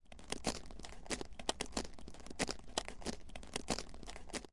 Masticar, morder, comer
morder, comer, Masticar